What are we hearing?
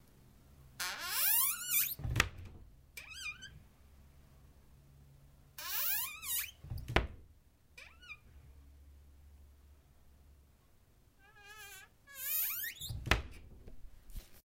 Puerta Chirriando
Wooden door squeaking.